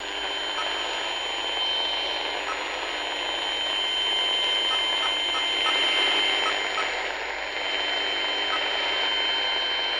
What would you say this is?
shtwv110108 c2 bleep
Shortwave radio sounds;